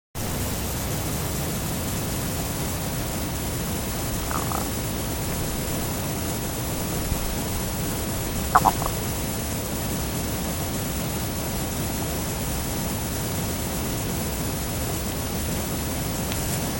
samsung gal III e
Sound recording test with a Samsung Galaxy III mini and a low cost external mic.